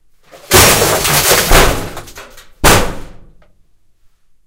Very loud metal crash. Recorded for an audioplay in which a man is throwing an angry fit. Stereo binaural mics.
crack, foley, hit, kick